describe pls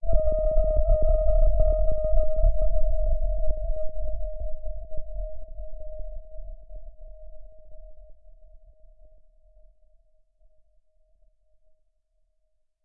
noisy hissing sound made in ableton with vocooder and bunch o other stuff